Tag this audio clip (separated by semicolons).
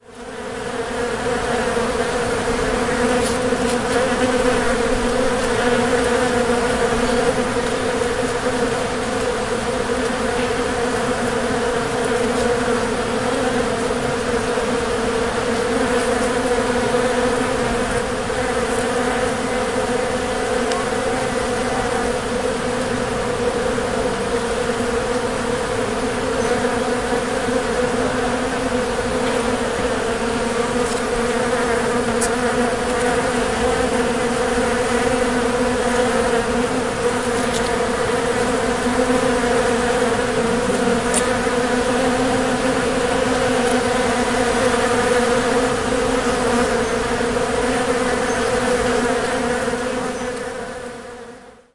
animals; Mont-Ventoux; pollen-grain; Ventoux